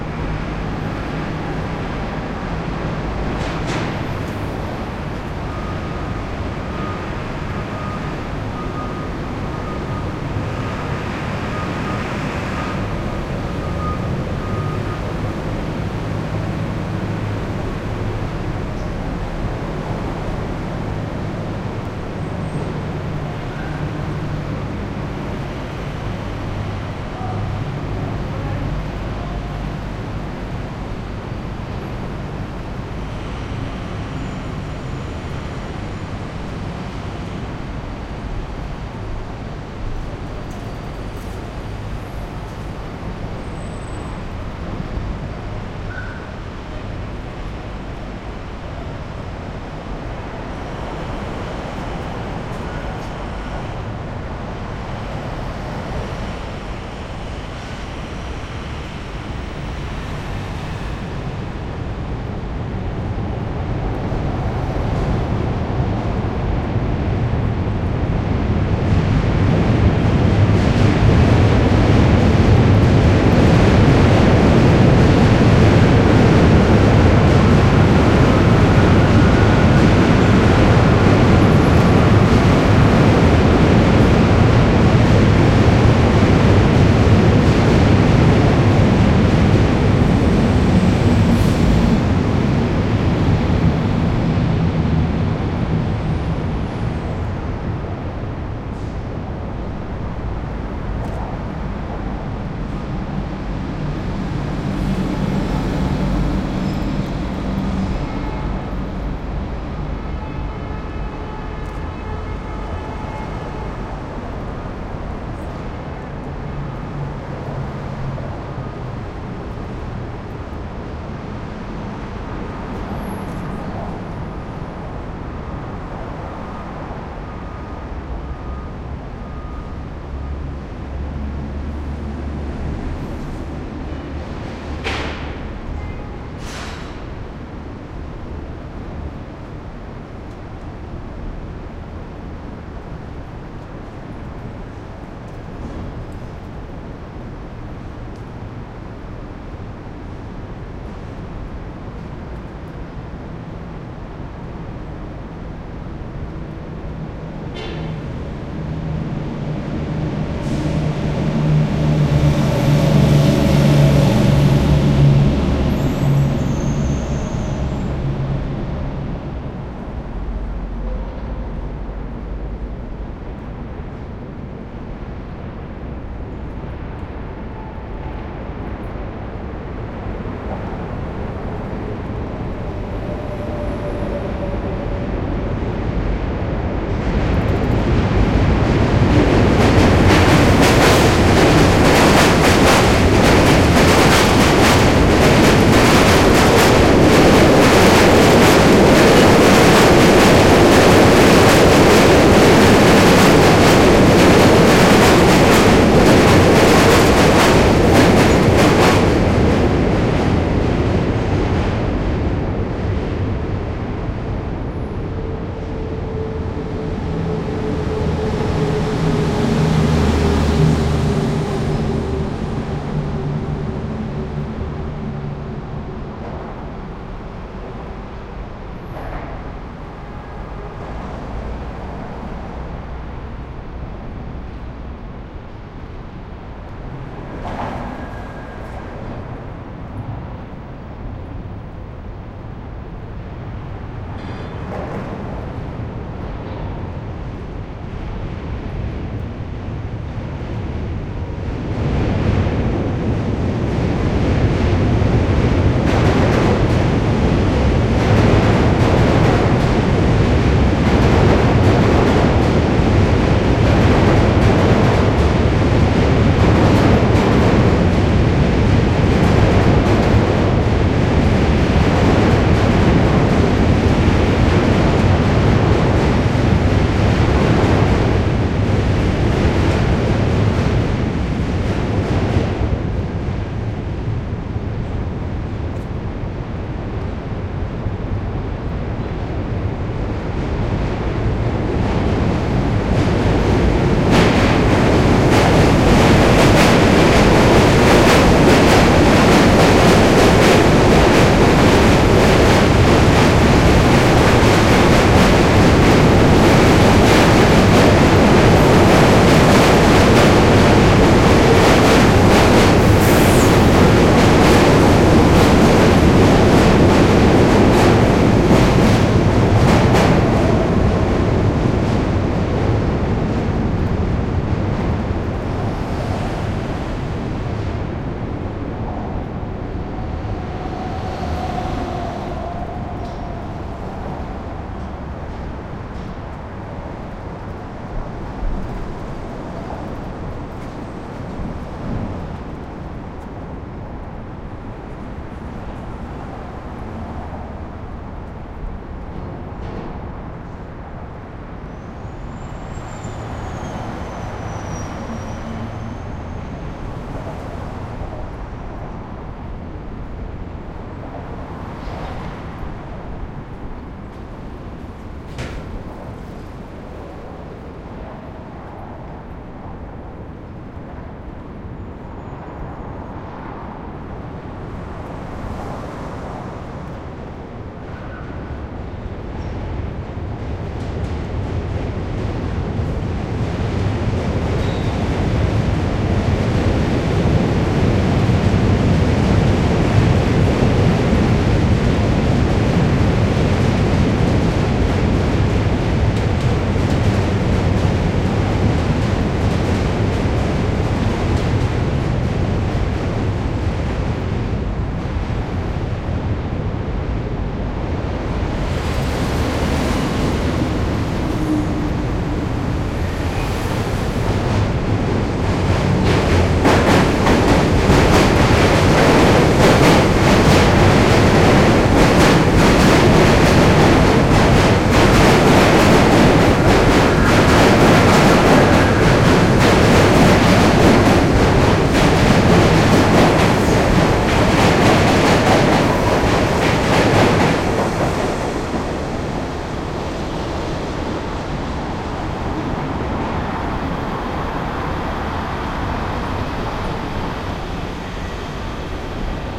traffic heavy cavernous Brooklyn bridge +trains passby NYC, USA
bridge, Brooklyn, cavernous, heavy, NYC, passby, traffic, trains, USA